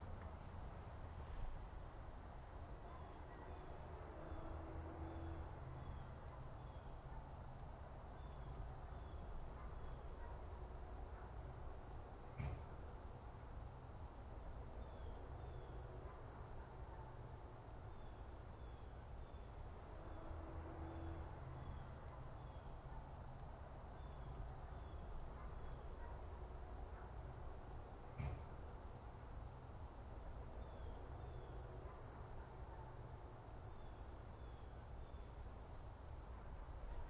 outdoor ambience in a rural area